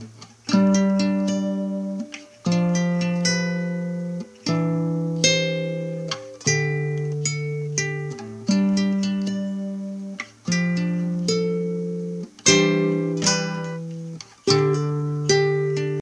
SAVE Guitar
A collection of samples/loops intended for personal and commercial music production. All compositions where written and performed by Chris S. Bacon on Home Sick Recordings. Take things, shake things, make things.
melody, acapella, drum-beat, percussion, Folk, sounds, Indie-folk, beat, guitar, bass, synth, voice, rock, indie, whistle, vocal-loops, acoustic-guitar, free, loops, looping, loop, drums, harmony, piano, samples, original-music